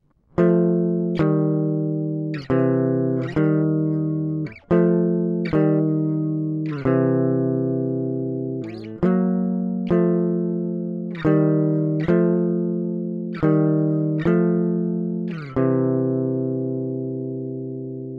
Improvised samples from home session..